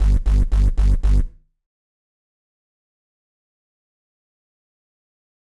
Reece Bass 1 Stabs BPM 174 G
BPM: 174 - KEY: G - Just some bass stabs.
Made in Reason!
bass, drumnbass, reece, drumandbass, dnb